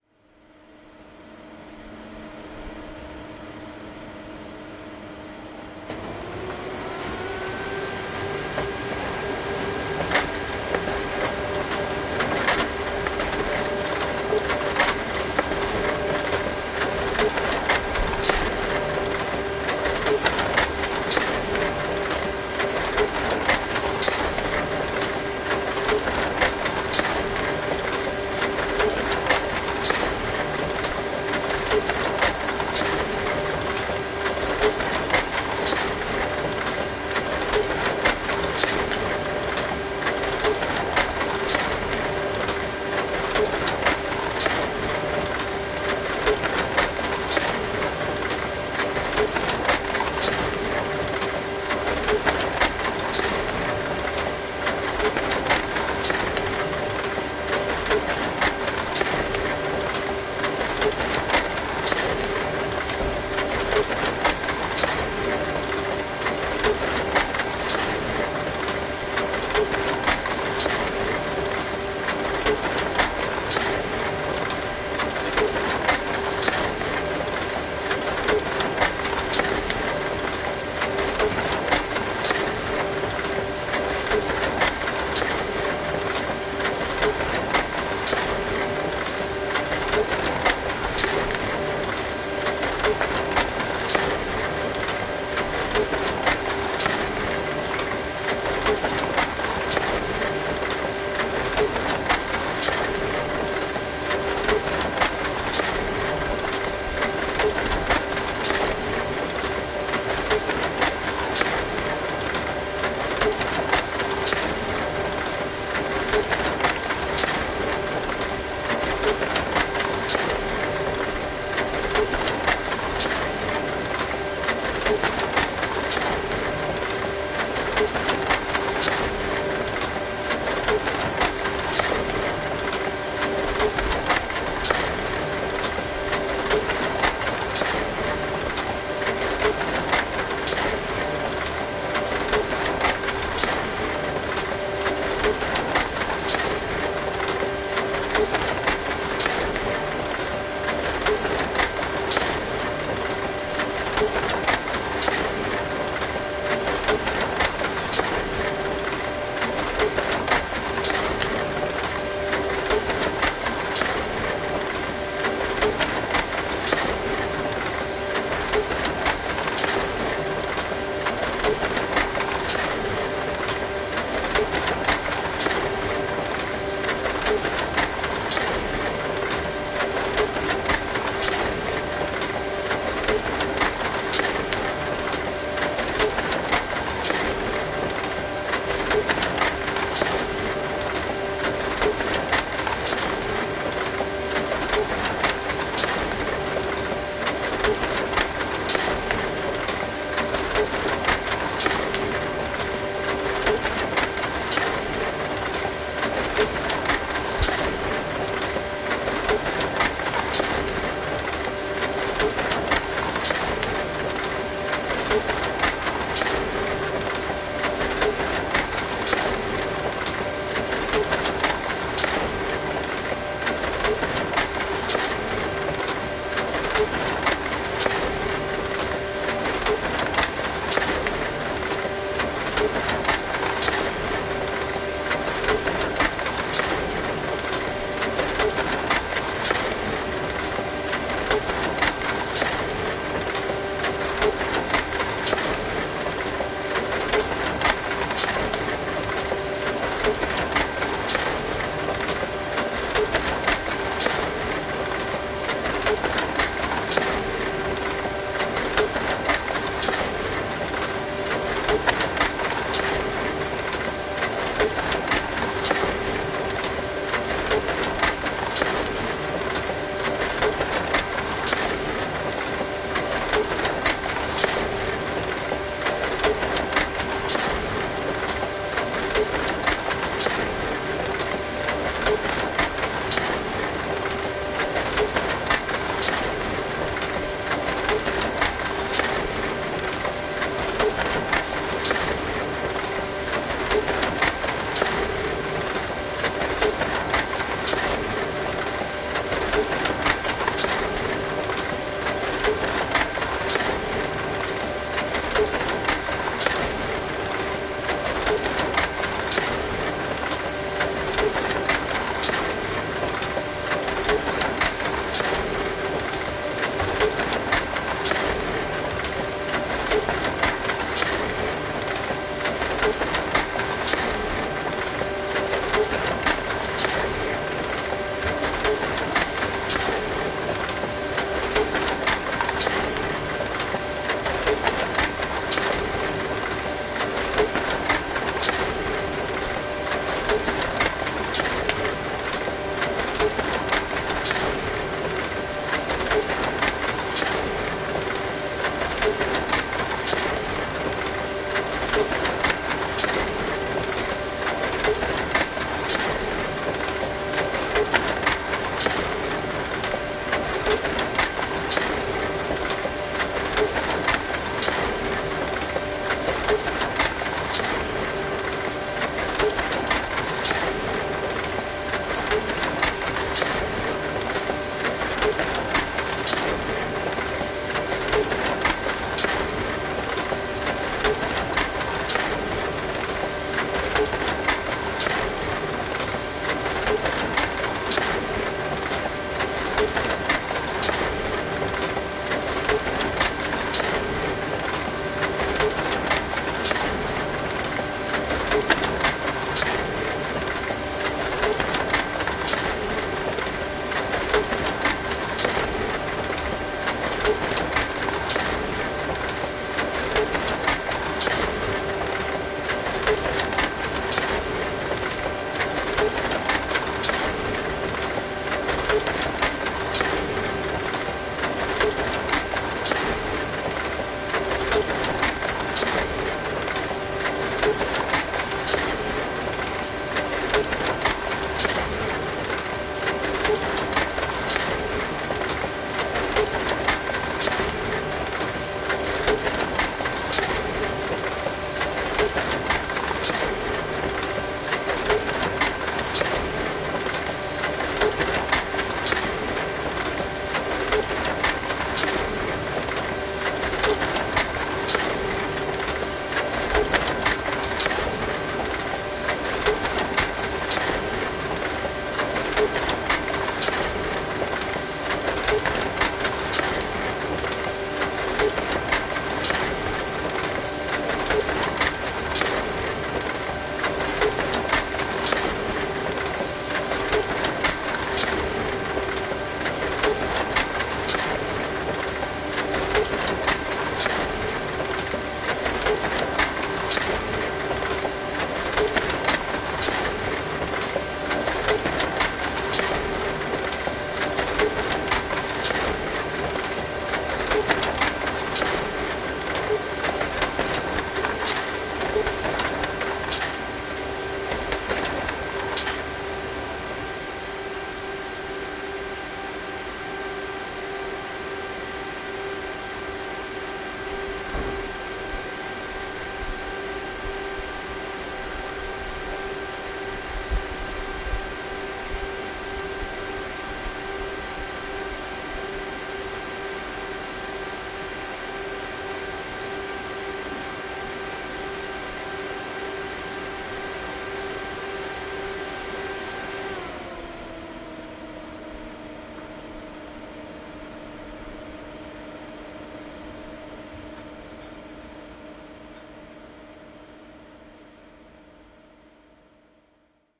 Printing my thesis with a black and white Laserjet 9040. Recorded in London with a Zoom H1 Handy Recorder.
office, printing, printer, rhythmic, machines